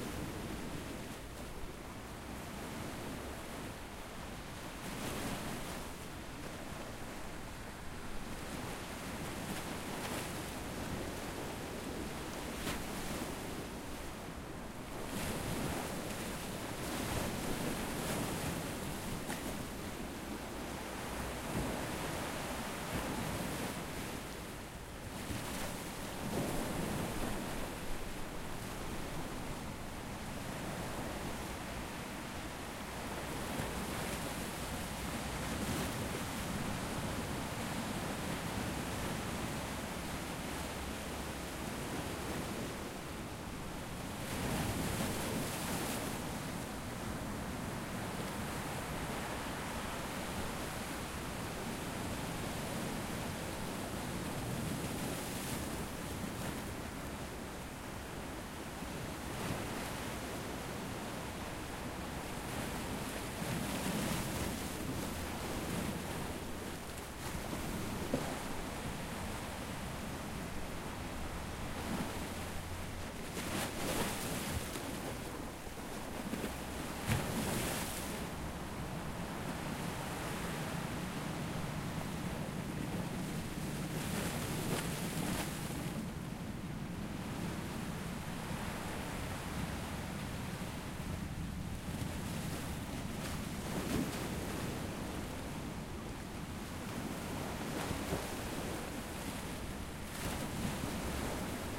The waves recorded near tore de belem in Lisbon.